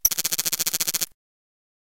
A short electronic noise loosely based on insects.